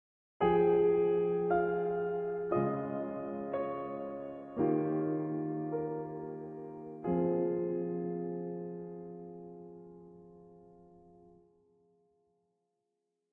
A melancholy phrase ending in sorrow.